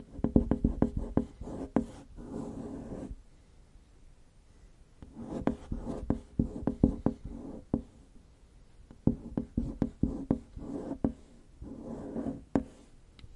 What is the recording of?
rub-the-glass
finger, rub, glass